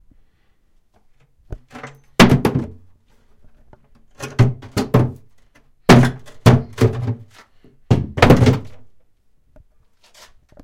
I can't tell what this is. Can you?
a recording of a moving table, though it doesn't really sound like it
dropping, house, london, moving, scraping, table